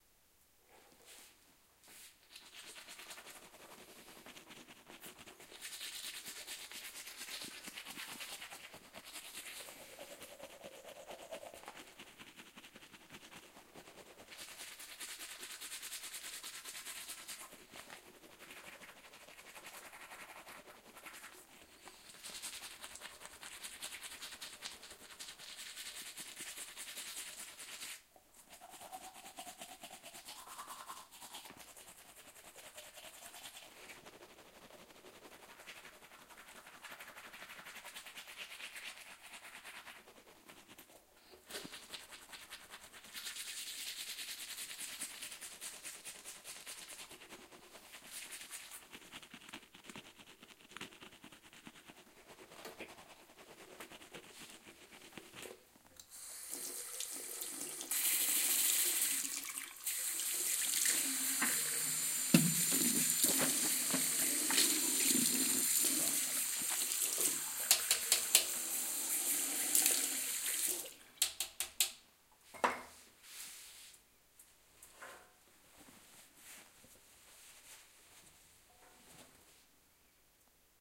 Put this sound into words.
brushing my teeth
binaural, brush, mouth, teeth, tooth, water